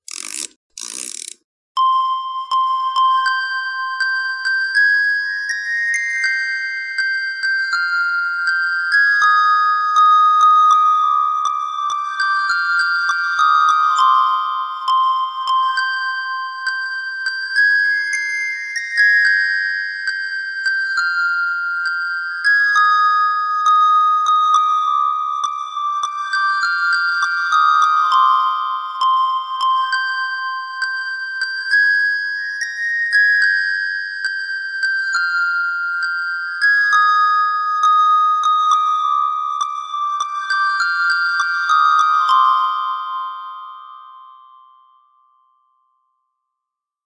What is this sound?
This is the updated melody I made called Sleepless Lullaby, Hope you enjoy:)
If you make anything please share a link in the comments, I'd love to see it :)